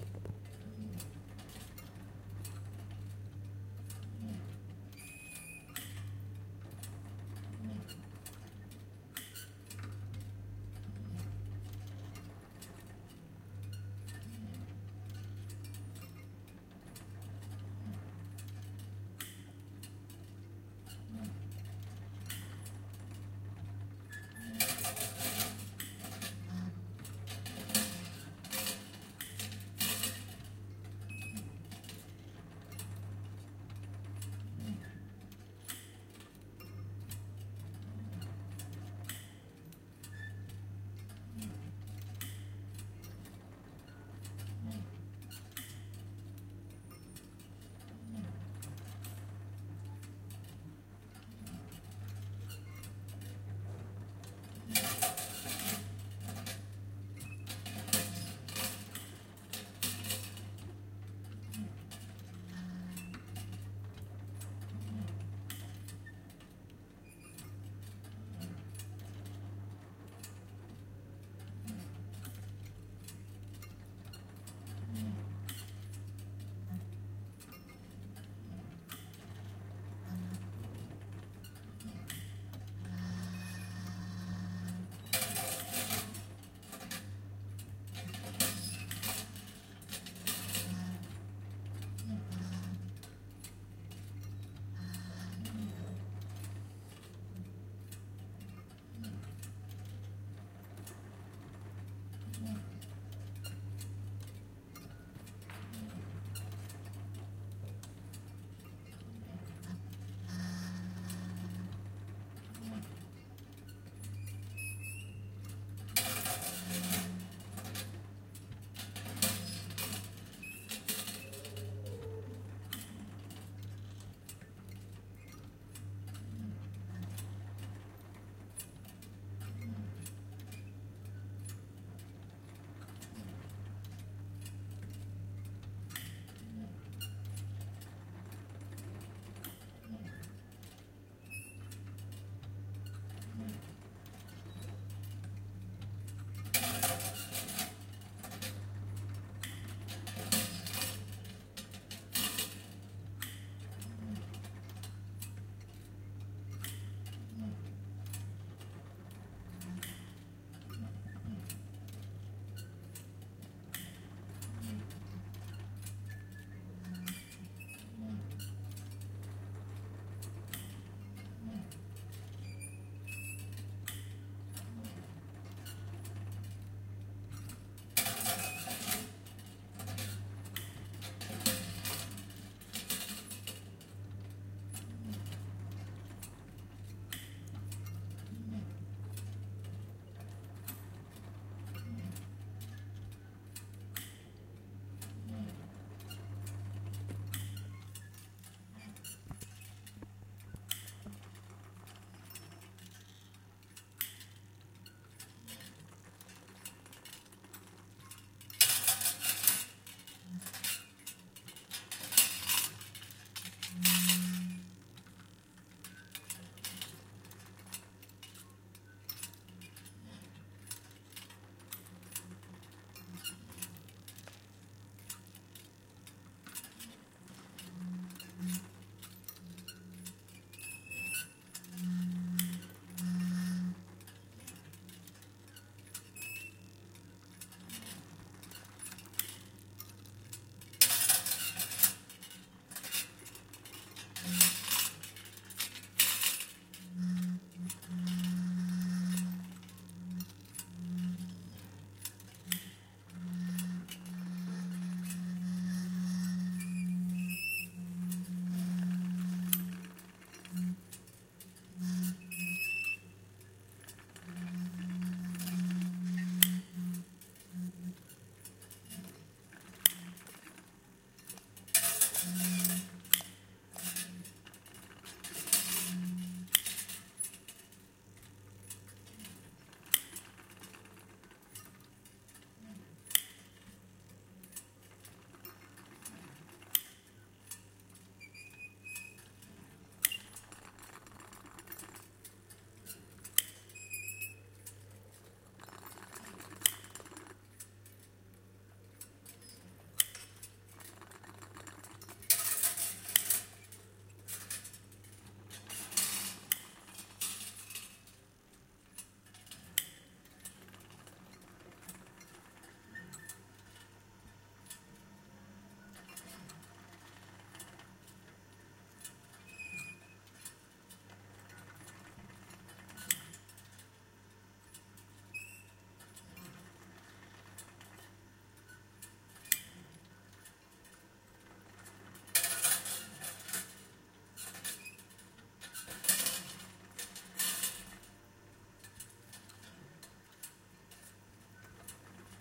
Incredible Machine Sound / Atmo / Squeks / Robot
A Machine created by an artist recorded for five minutes.,